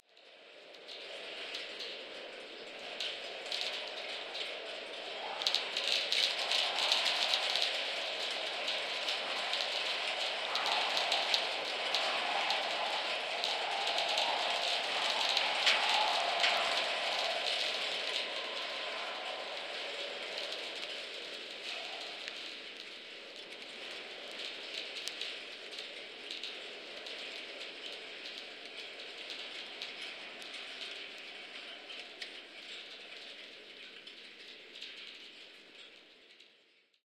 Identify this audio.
Excerpt of a recording of wind through a wire fence near the top of a hill, picked up by two contact microphones. The mics were placed at points along the fence several meters apart, and also at different heights; one mic on a single isolated strand at the top while the other was attached to the top of a grid of wires just underneath (but a few meters along). As well as the sound of wind, there is lots of metallic / granular sound of the wires hitting fence posts and some hints of pitched resonance in the wire at times.
Protip: don't let the cables of contact mics dangle freely in a set-up like this or they will make contact with the wire near the mics in an intrusive way (this recording does not feature these intrusions, all the clangy noises are from more distant bits of wire).
This sample has been mildly processed to remove a couple of clicks, I still detect some noise probably from a dodgy cable connection on the left hand channel at times.
wind, metal, contact-mic, wire-fence, field-recording